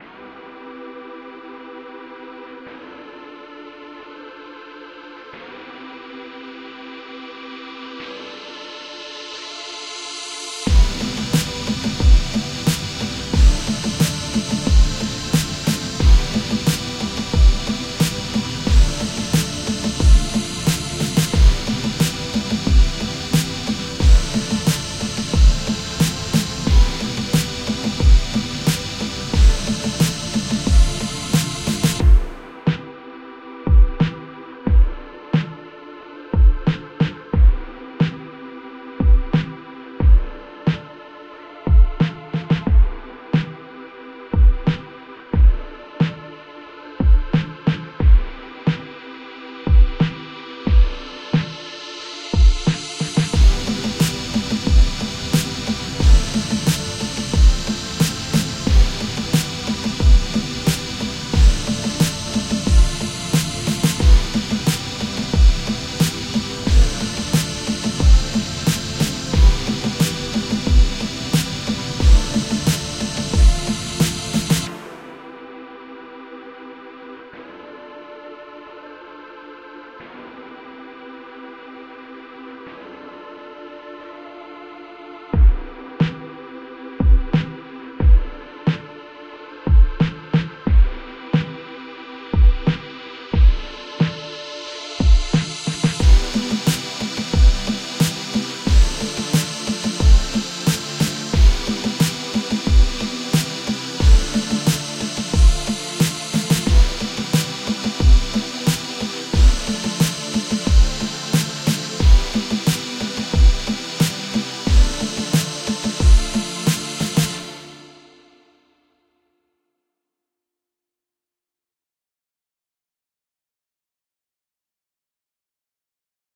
Steamy Beat
steamy, lofi, beat, percs, groovy, percussion-loop, garbage, beats, drum-loop, quantized, rubbish, lo-fi